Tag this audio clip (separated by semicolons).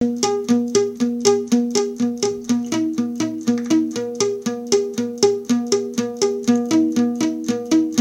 acapella; acoustic-guitar; bass; beat; drum-beat; drums; Folk; free; guitar; harmony; indie; Indie-folk; loop; looping; loops; melody; original-music; percussion; piano; rock; samples; sounds; synth; vocal-loops; voice; whistle